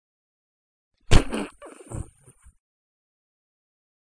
Stab w.out metal 04
(Yet) Another fleshy stab, this time without the metal 'shing' that comes with it.
gore, knife, screwdriver, stab